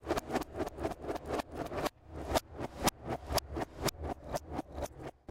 This is the sound of a muted electric keyboard, and the sound has been reversed.